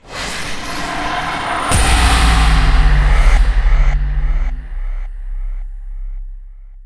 Spell explosion
Heavily relying on granular synthesis and convolution
loud, impact, magic, explosion, spell, wizard